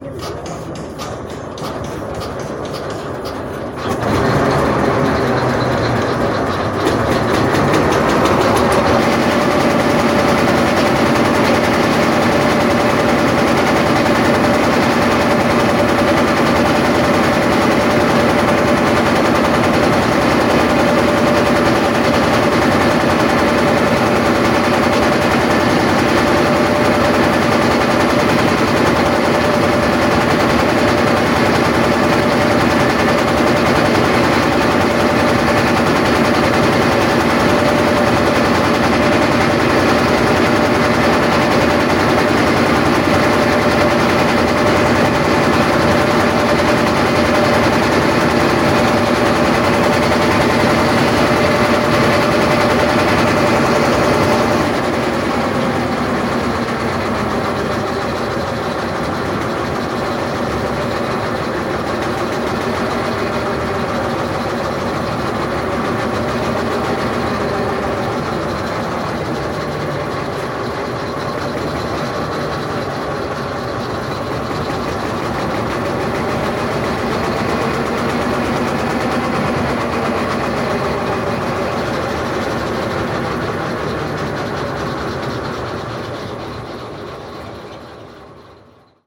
small motor boat in river hooghly of west bengal carrying passenger and other things.